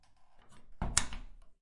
Door lever latch close. Recorded on a Zoom H4N using the internal mics.
door, close, lever, latch